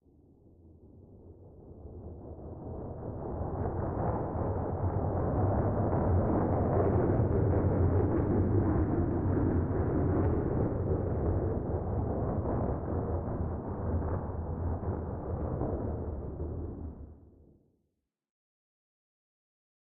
digging a blackhole
Fx_Soundscapes from manipulating samples(recording with my Zoom H2)
fx, lfe